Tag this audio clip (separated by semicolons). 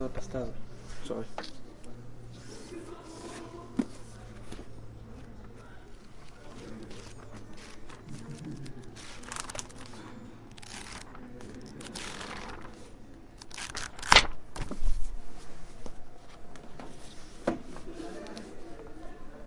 book; books; library; pages